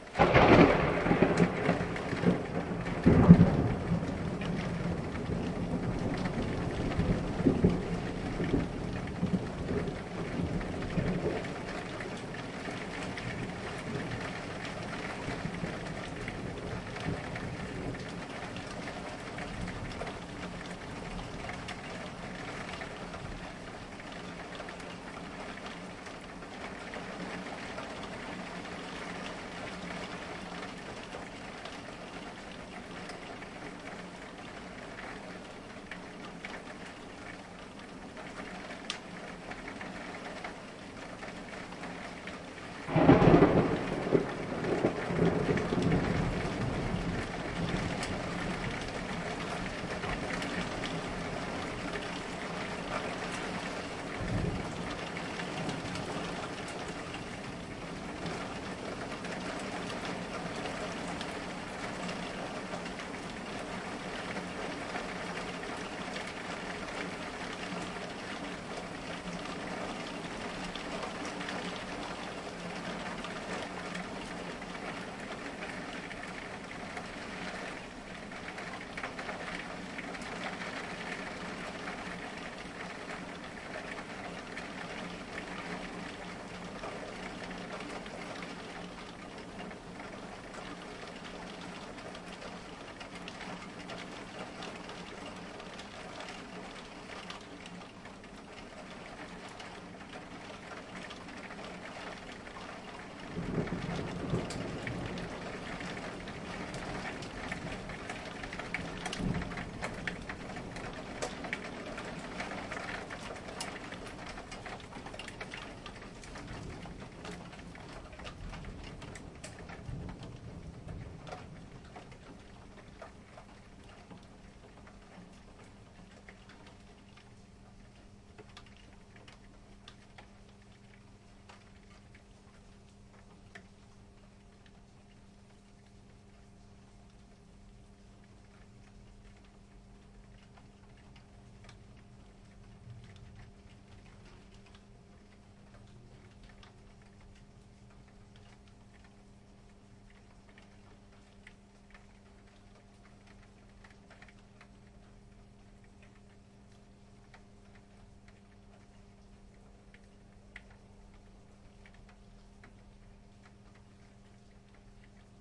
Stuck my Zoom H2 out of the window during a rather tasty thunder storm.